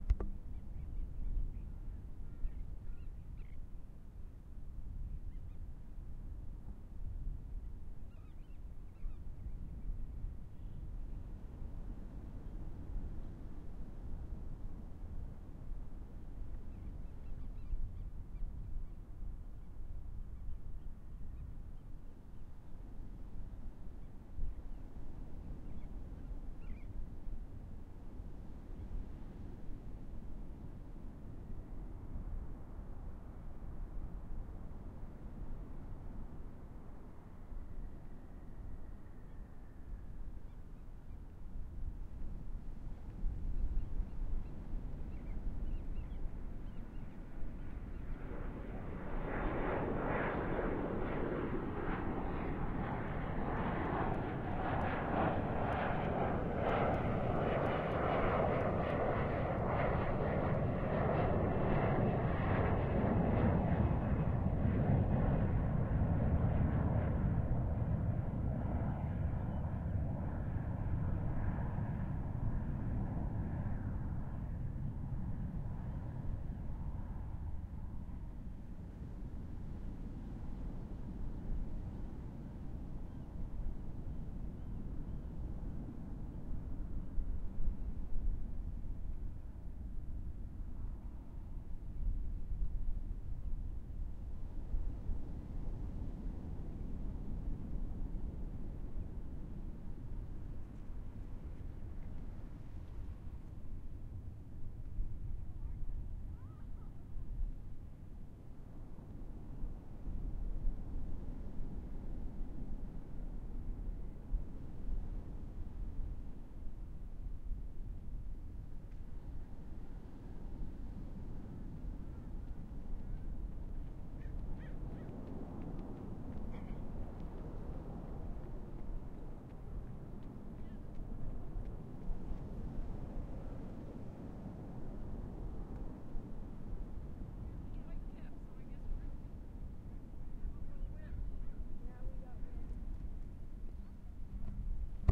Recorded a small part of a day at the beach, so there are people and the middle of the recording has an airplane, but there are also waves and wind.